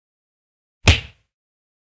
Cartoon Punch 06
cartoon-sound, cartoon, punch